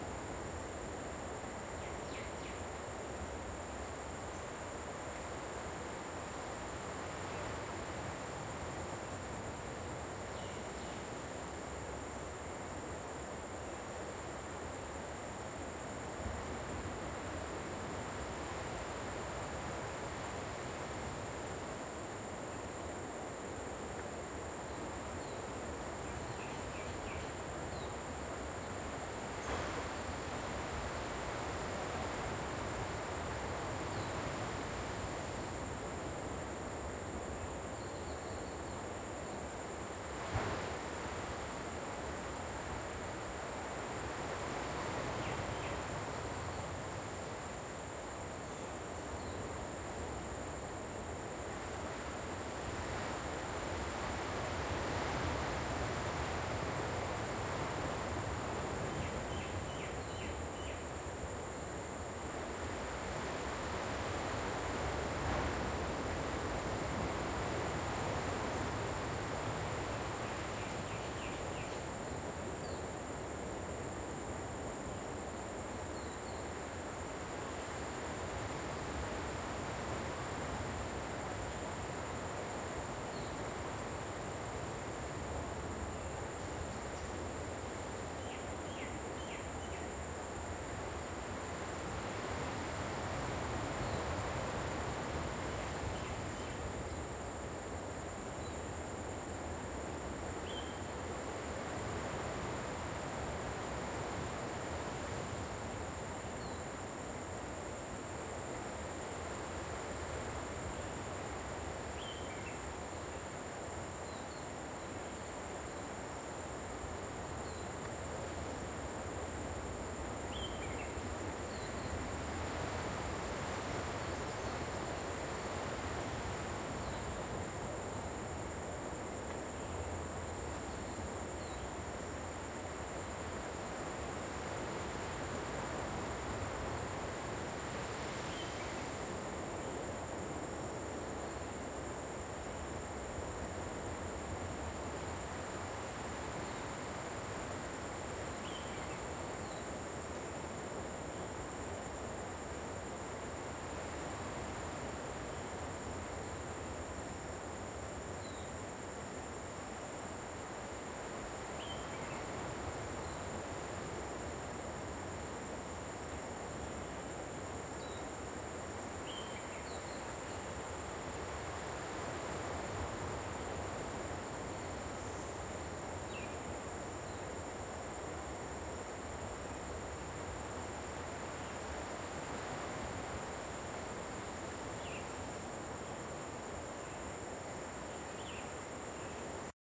Amb tropical - Insect, waterfalls, waves Anse Des Cascades, Reunion Island
Ambient sound of the Anse Des Cascades (Reunion Island, near Sainte Rose). A natural tropical cove surrounded by waterfalls on one side, and the ocean on the other. Many insects chirping and hissing too.
Recorded with : Zoom H1 stereo mic (1st gen)